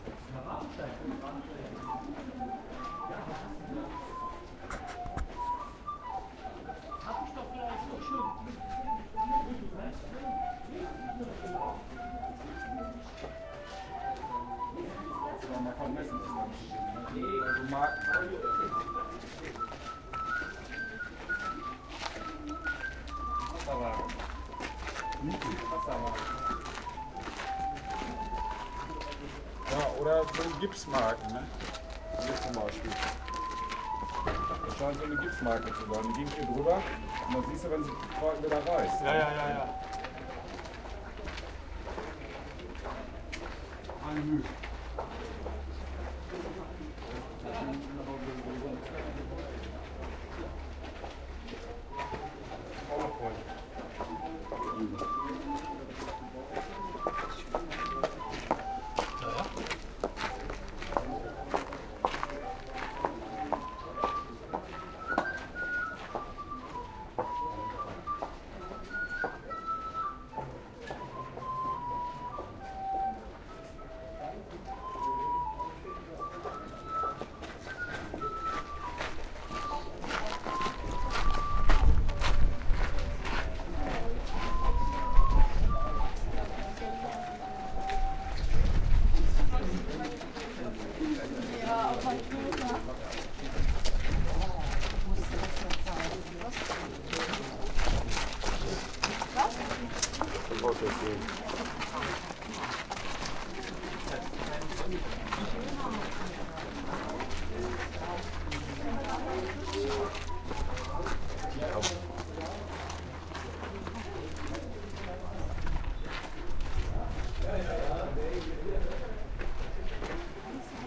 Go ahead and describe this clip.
at the castle
On the way up to the Quedlinburg castle-hill a bloke was playing the flute. Felt just right. PCM-D50 inside mics.
castle, church, fieldrecording, flute, harz, quedlinburg